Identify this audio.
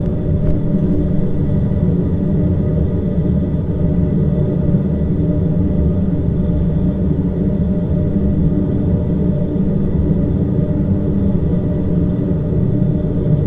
Rio Vista Ventilation
Ventilation sound in small room (loo) of an historical building in Mildura Australia. First noticed the drone years ago but didn't have a portable recorder. Thought it would would be a great track for a dramatic scene.